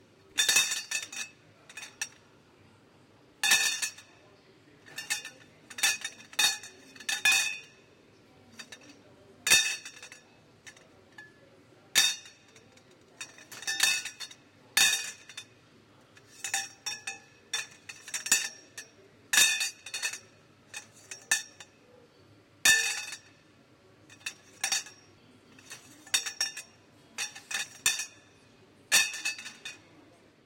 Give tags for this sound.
Factory Industrial Metal Metallic

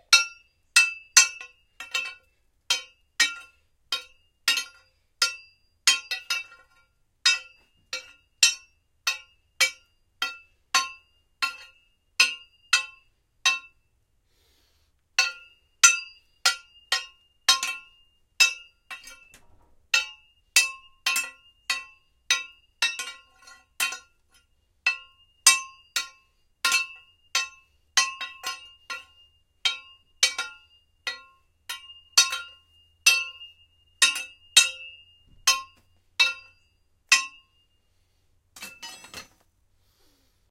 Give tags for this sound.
vs crowbar attachment jackhammer

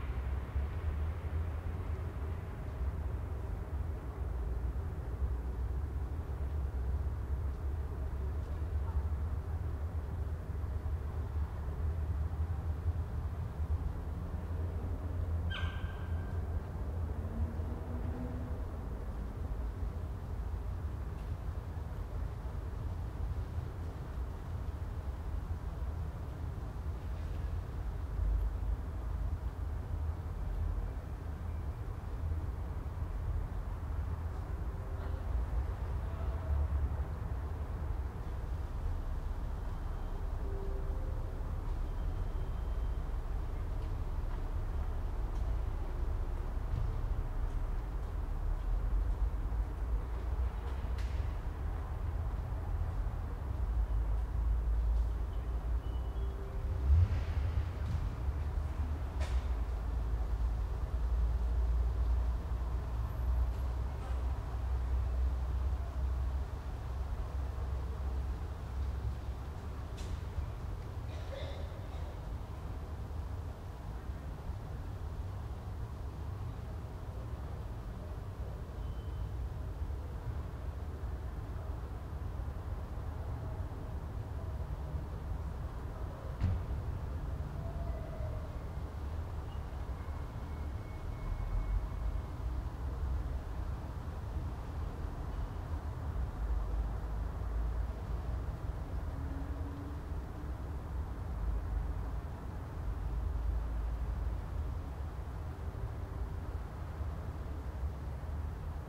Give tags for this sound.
ambience car city distant field-recording footsteps night rumbling traffic

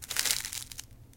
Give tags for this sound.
click clink crunch drink glass ice ice-cube ice-cube-tray twist

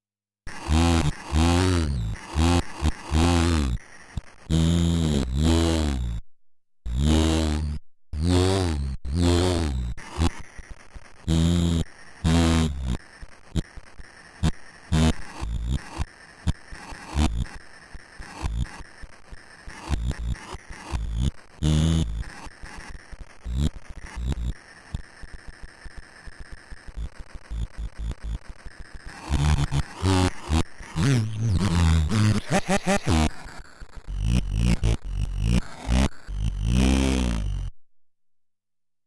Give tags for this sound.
vocal bent glitch circuit

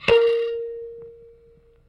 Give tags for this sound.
bleep blip bloop electric mbira piezo thumb-piano tone

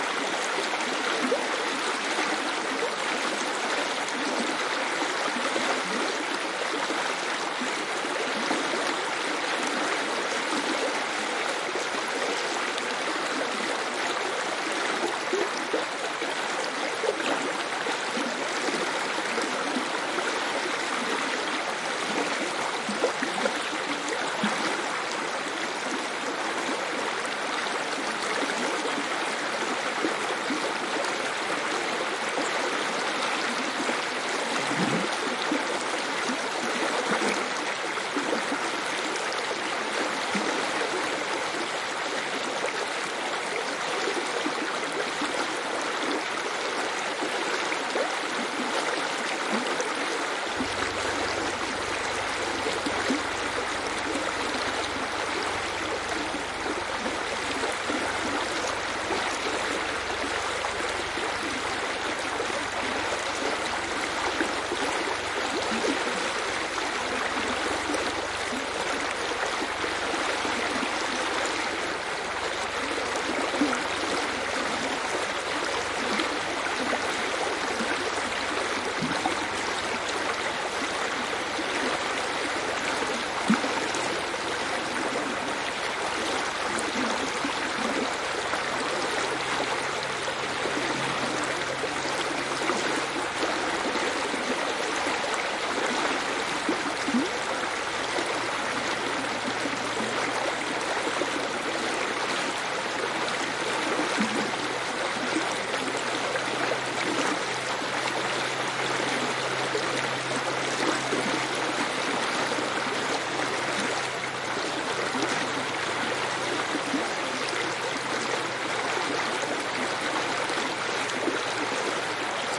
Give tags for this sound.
brook creek field-recording flowing forest nature river stream water

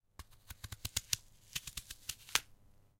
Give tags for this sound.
apple foley short food sounddesign sound-design sfx effects

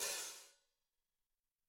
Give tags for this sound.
Drums Hit Whisk With

